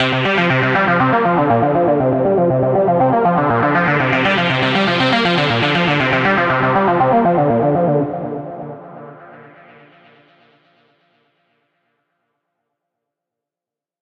This soundwave is the synthesis of a guitar and a piano, both run through heavy distortion, and looped.